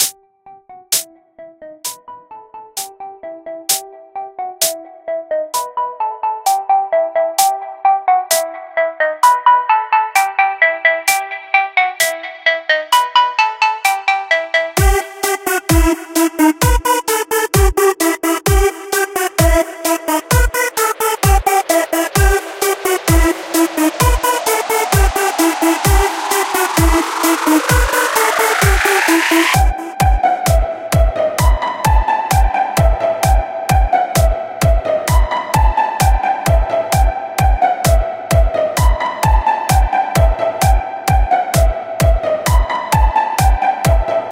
Fainted Music Jam